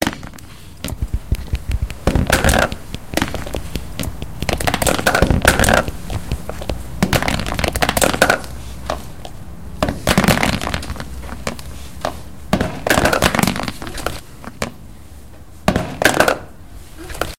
soundscape SGFR louna et laura
first soundscape made by pupils from Saint-Guinoux
france, cityrings, saint-guinoux, soundscape